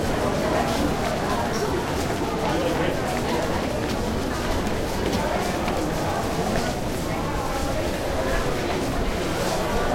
footsteps large crowd int metro subway steps good smooth movement Montreal, Canada
crowd, large